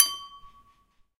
tapping a glass in the kitchen